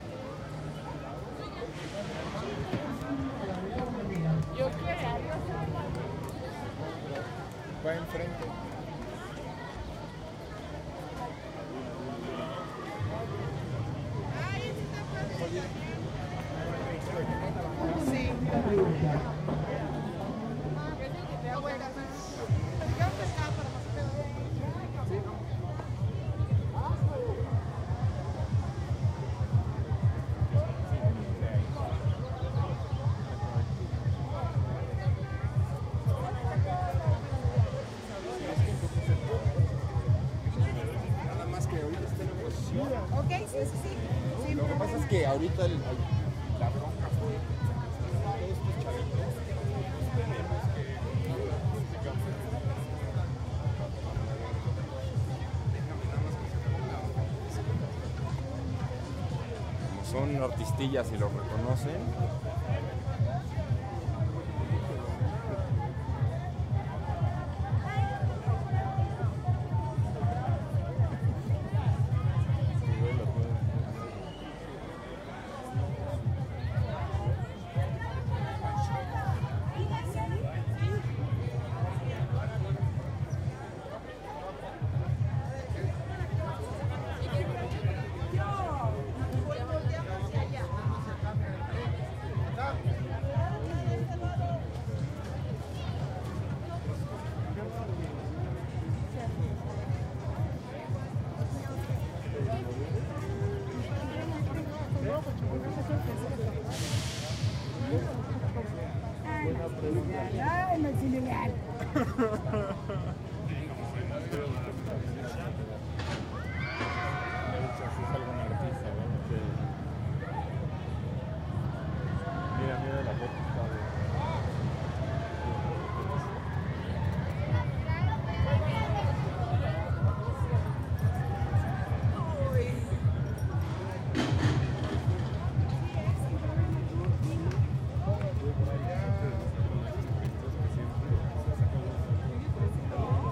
Amusement Park (Ambience)
Six Flags México ambience.
crowd, ambience, field-recording, games, outdoor, mechanical